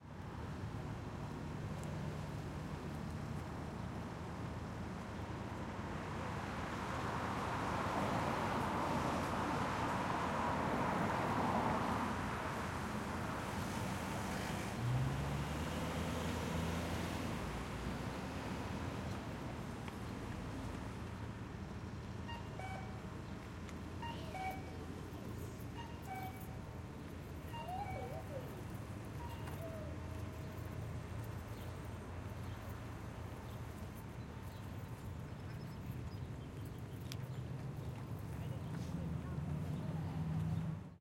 4 - Forbes & Morewood Intersection - Trk-6 N.West to N.East
Field Recordings from May 24, 2019 on the campus of Carnegie Mellon University at the intersection of Forbes and Morewood Avenues. These recordings were made to capture the sounds of the intersection before the replacement of the crossing signal system, commonly known as the “beep-boop” by students.
Recorded on a Zoom H6 with Mid-Side Capsule, converted to Stereo
Editing/Processing Applied: High-Pass Filter at 80Hz, 24dB/oct filter
Recorded from the north-east corner of the intersection, moving to the north-west corner.
Stuff you'll hear:
Bus idle
Quiet voices walla
Car bys (throughout, various speeds)
Footsteps
Crossing signal (0:22) (recordist crosses street)
Jingling keys
intersection, summer, street, outdoors, Carnegie-Mellon-University, voices, crossing, cars, traffic, bus, field-recording, campus, signal, boop, CMU, beep, outside, Pittsburgh, car-by